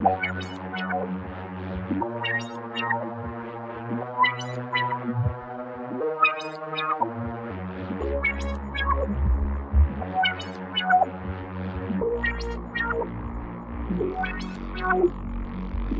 arp bass
synth bass in D# minor (120 bpm)